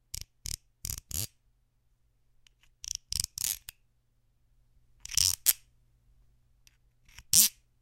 Knife-Spoon2
Using a serrated knife on the edge of a small spoon, recorded with Neumann TLM103